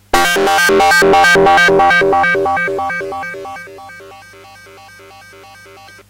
Comet low F nice noises in the fade
80s, comet, fx, portasound, pss170, retro, yamaha